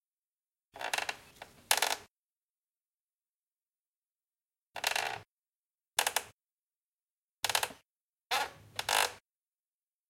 Chair creaking back and forth 01
Chair creaking back and forth. Recorded on a Q2HD Zoom recorder.
chair, creak, creaking, creaking-chair, creaky, squeak, squeaking, squeaky, wood